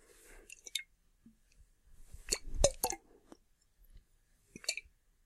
Drink Glass Bottle
Been downloading off this site for ages and thought I'd give something I recorded back. I drank from a glass bottle by a mic to recreate a character in a film drinking some beer from a glass bottle. A small sound but in those quiet scenes I think noises like this make all the difference.
Done on my Olympus DM5 recorder that I use for last minute foley.
Hooray I'm finally on here!
Bottle, Clink, Water, Liquid, Drink, Glass